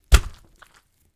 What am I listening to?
Impact with gore 7
Some gruesome squelches, heavy impacts and random bits of foley that have been lying around.
mayhem, death, splat, gore, squelch, foley, blood